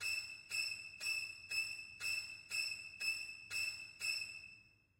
Scary Violin Sounds
Crazy, Creepy, Halloween, Horror, Old, Scary, Violin